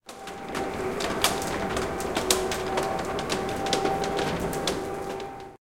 Garagedeur-Electrisch2
Garage deur electrisch door electric
deur, door, electric, electrisch